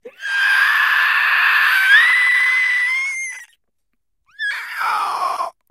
Male Inhale scream 4
A dry recording of male screaming while breathing in.
Recorded with Zoom H4n